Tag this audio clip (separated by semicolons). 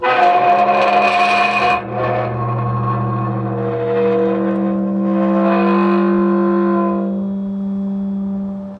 creak; moan; iron; gate; hinges; groan